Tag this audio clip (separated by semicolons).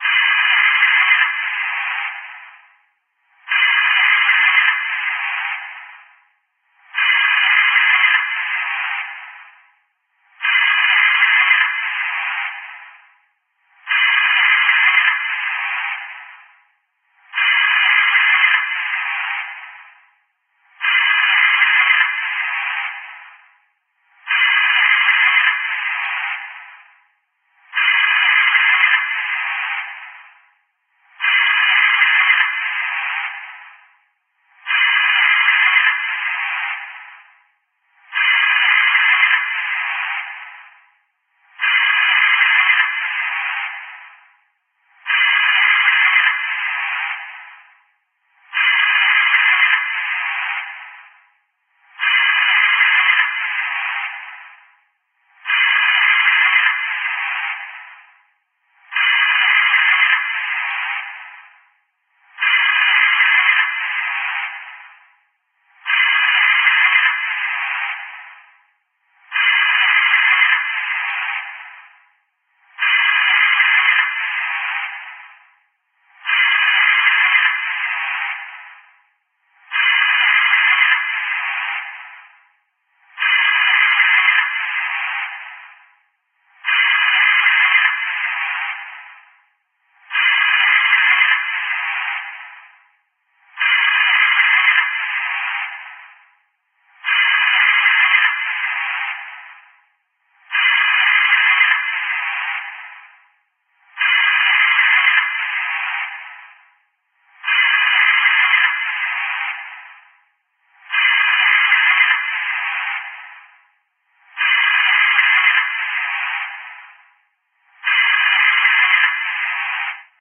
18-breaths-pm; ba; breathing; breathing-apparatus; foley; gas-mask; mask; respirator